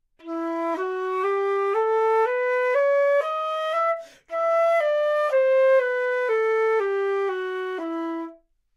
Flute - E natural minor
Part of the Good-sounds dataset of monophonic instrumental sounds.
instrument::flute
note::E
good-sounds-id::6991
mode::natural minor
scale, Enatural, neumann-U87, good-sounds, flute, minor